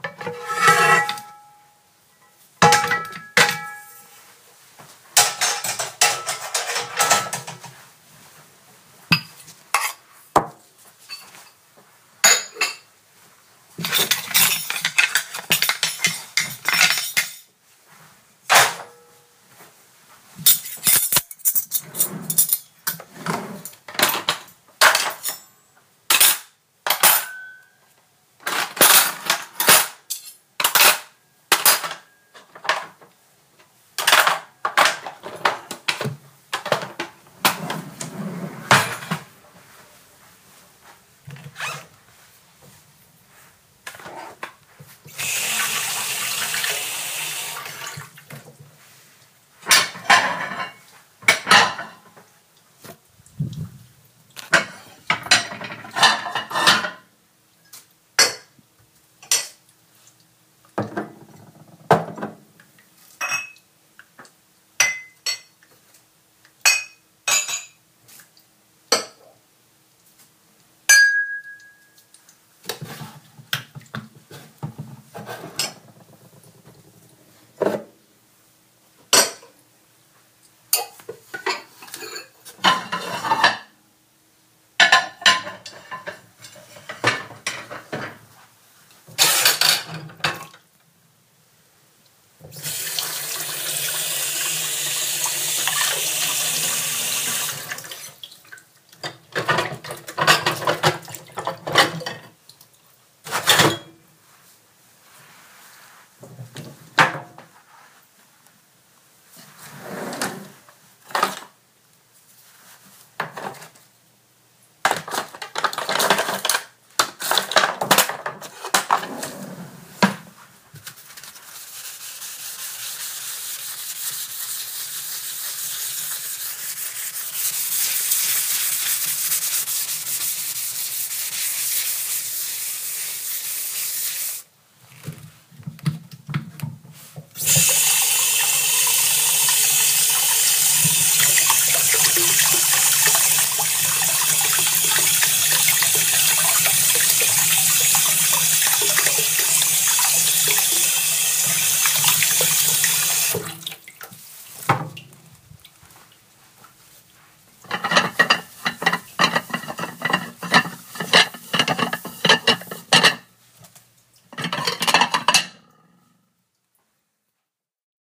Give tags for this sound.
water; dishes; wash; sink; kitchen; home

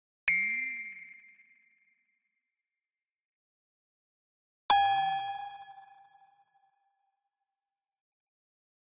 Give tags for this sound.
soundscape; ambient

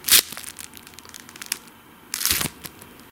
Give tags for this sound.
slash; blade; cut; knife; weapon; sword-slash; flesh; slice; stab; katana; sword

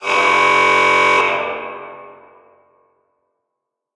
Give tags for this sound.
alarm; alert; klaxon; warning

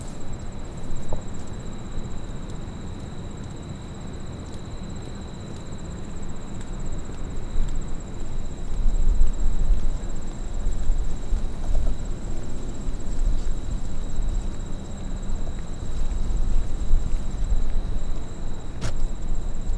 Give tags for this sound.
background; nature; Trees

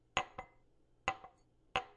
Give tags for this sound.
put; down; place; putting; glass; cup

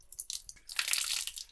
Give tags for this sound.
gush; splash; squirt; water; liquid

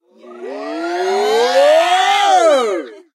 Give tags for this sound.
stabs; 129bpm; vocal; party; shots; female; vocals; stab; male; shot

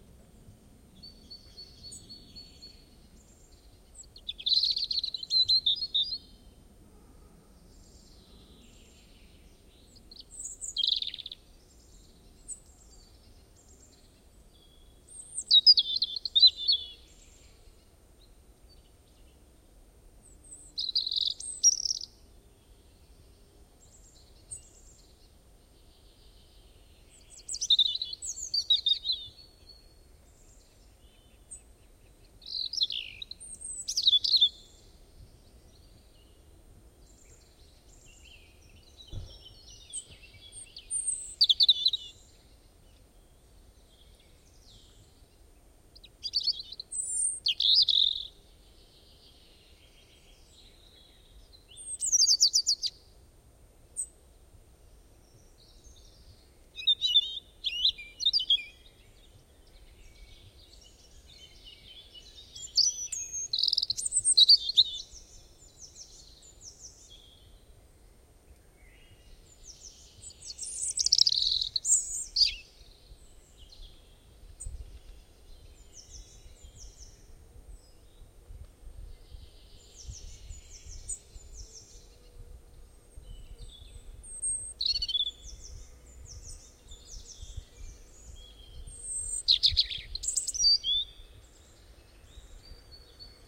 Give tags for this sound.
bird; birdsong; forest; robin; spring

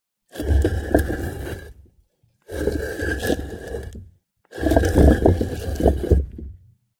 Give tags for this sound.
scrape; grit; gravel; concrete; move; sliding; dirt; drag; dragging; heavy; Brick; rough; low

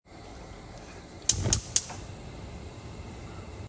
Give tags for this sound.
cook; pan; stove

